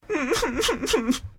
09-Hombre1Llora
cry, crying, depressed, emotional, sad